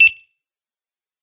Store Scanner Beep
Background noise removed, normalized to zero, clip includes just the beep, and a light reverb applied to keep it from sounding too harsh.
Perfect as a foley for a scene with someone scanning items over a scanner at the cash register.
Thanks to bsumusictech for the original recording.
barcode, beep, scanner, store